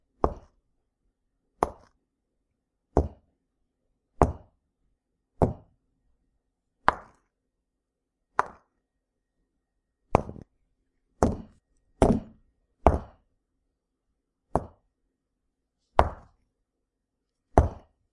I created this sound by taking two large cobblestone blocks and hit one against the other. Good for sound design. There is a little rattle on some of the heavier hits, it couldn't be helped since the block is so heavy.
blocks, cobble, cobblestone, foley, heavy, hit, impact, knock, large, rocks, stone